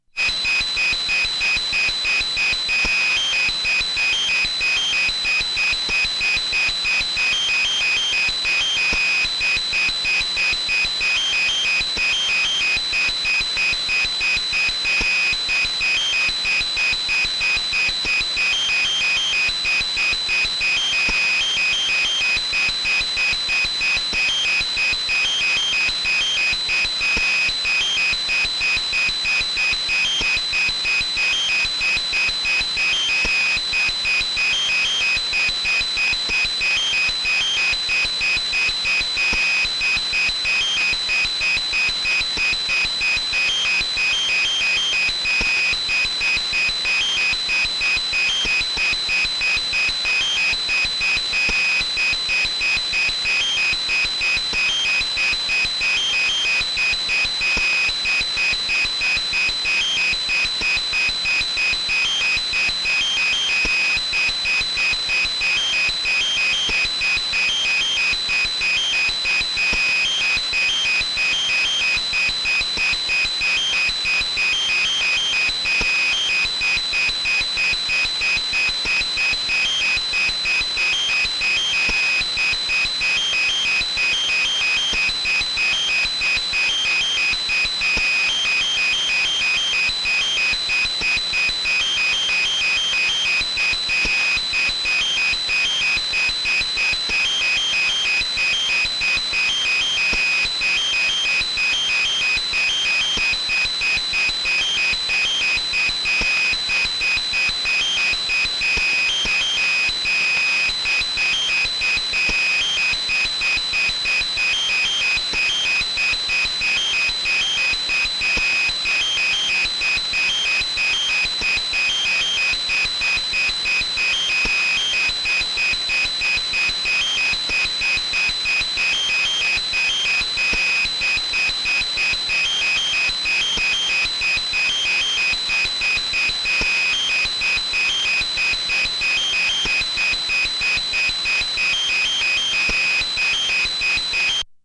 AT&T Cordless Phone off Charger motionless 530 KHZ
Electro-magnetic interference from an AT&T; cordless phone handset CL82301 when held near the internal Ferrite antenna on the back right of a 13-year-old boombox at 530 KHZ in the AM broadcast band. Recorded with Goldwave from line-in. Recorded just after the 1700 KHZ recording in the same pack. You hear a series of beeps shifting between one of 3 tones. These are the fastest and highest pitched standby tones I've come across with this phone. Every day, and sometimes different times in the same day, you may get different tones at different speeds.